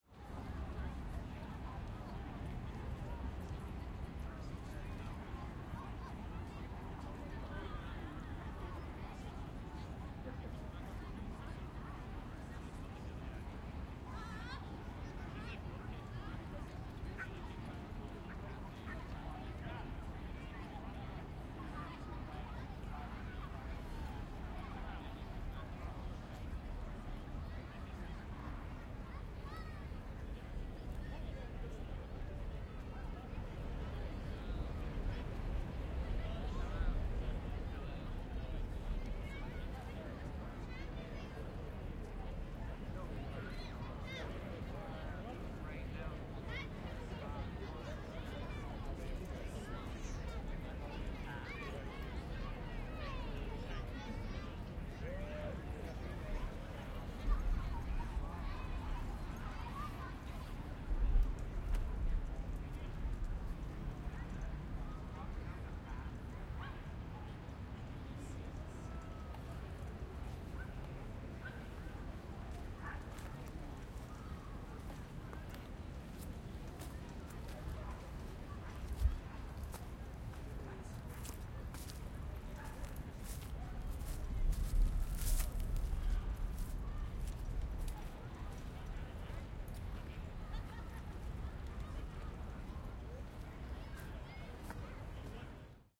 Sunny day in Prospect Park Brooklyn New York
Field-Recording, NYC, Park, Prospect